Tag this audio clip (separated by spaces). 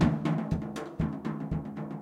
drum loop